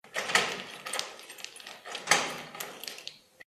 steel door opened with keys record20151219023838
Steel door opened with keys. Recorded with Jiayu G4 for my film school projects. Location - Russia.
door, keys, opening, unlock